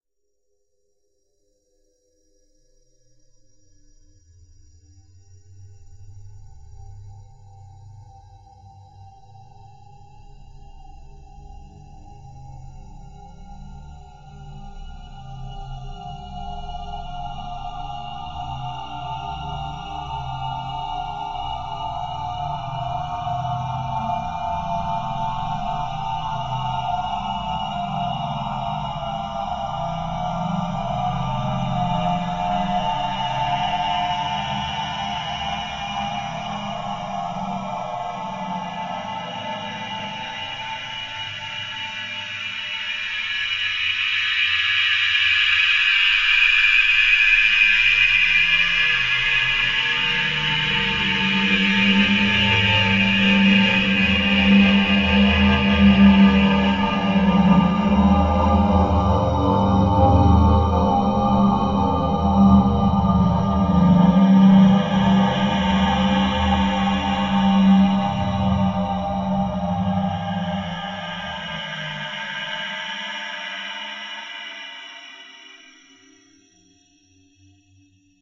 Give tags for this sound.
Alien-Beam-Transport Alien-Hyperdimensional-Drive Alien-Power-Surge

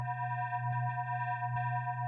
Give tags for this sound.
ambient artificial divine dreamy drone evolving experimental multisample organ pad reaktor smooth soundscape space synth

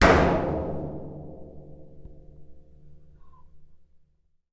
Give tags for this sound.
barrel metal single-hit

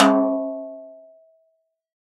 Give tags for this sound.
1-shot velocity multisample drum snare

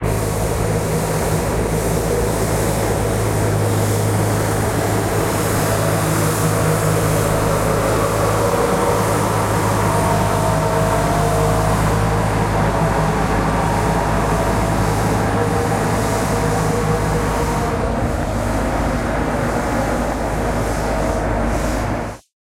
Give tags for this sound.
dark drone noise fx urban ambient sound-design sfx wide sound industrial effect

